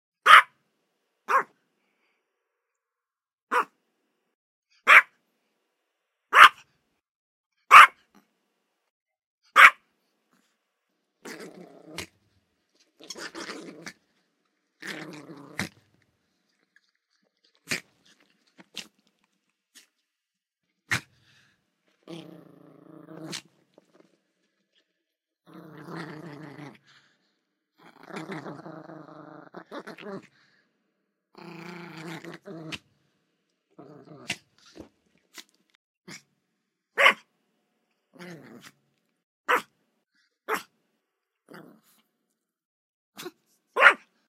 Yorkie Barks and Growls
This is a mono recording of my dog Rylie who is a 11 year old Yorkshire terrier. This includes a variety of different barks and growls as she plays with her ball. Recorded with a Apex 430 Studio mic into a Alesis Multimix 16 firewire mixer.
bark, dog, growl, small, terrier, yorkie, yorkshire